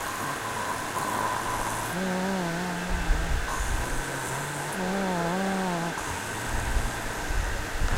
Malaysia DT TE03 KLCentralStation
Central-Station, Texture